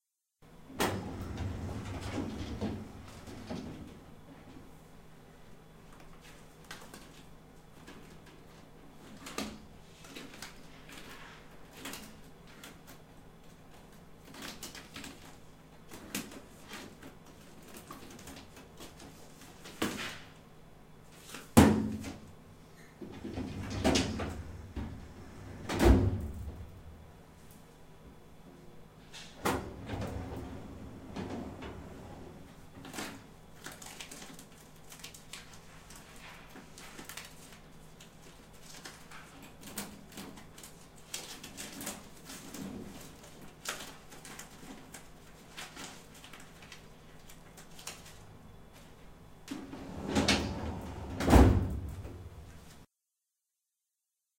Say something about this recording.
metal file cabinet Opening of the first drawer seach through files closing the first drawer.... Opening of second drawer search through paper and close the metal drawer